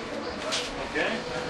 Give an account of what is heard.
wildwood moreyloop
Loop from Morey's Pier in Wildwood, NJ recorded with DS-40 and edited in Wavosaur.